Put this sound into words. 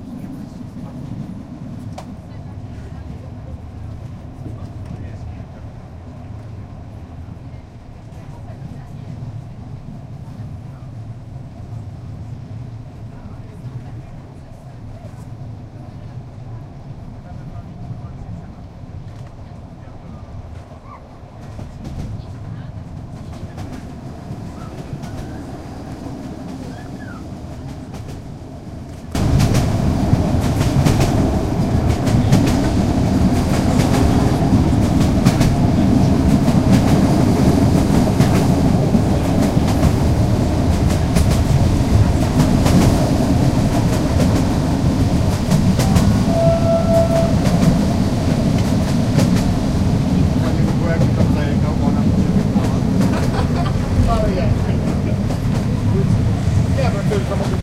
Ambience Train 1
It is taken inside the local train during the journey.
train, wagoon, transport, journey